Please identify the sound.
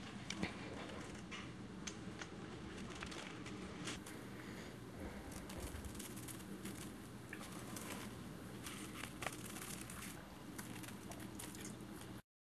A recording of painted lady butterflies fluttering their wings in an enclosure (contains a little background noise). Enjoy!